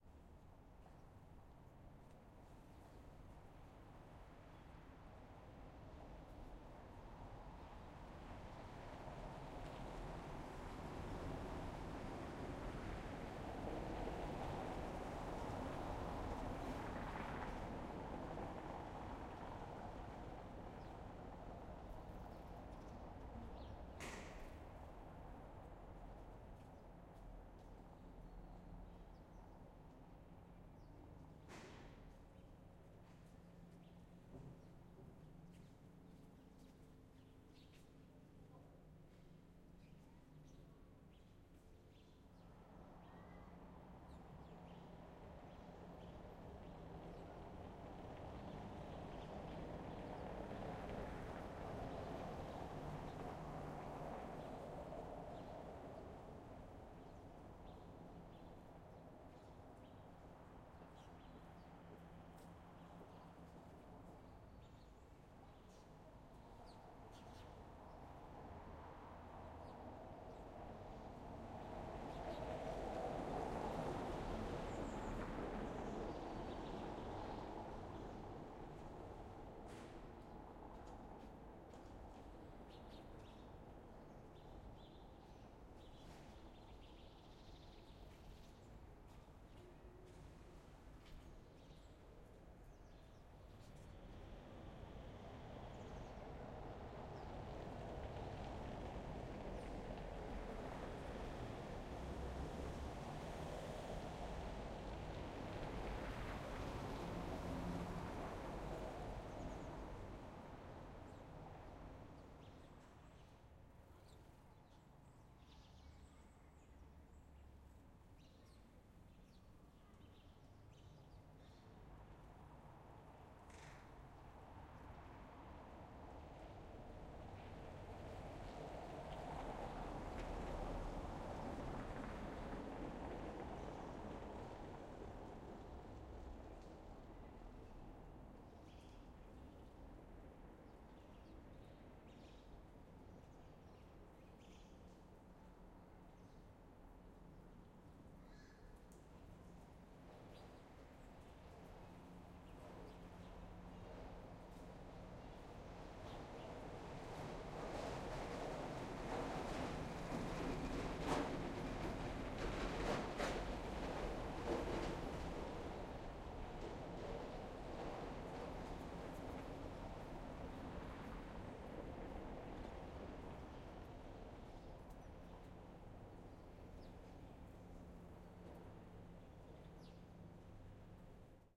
BG SaSc Multiple Cars Passing Cobble Stone Car Passes Birds
Multiple Cars Passing Cobble Stone Street Car Passes Birds
Birds, Car, Cars, City, Multiple, Passing, Stone, Street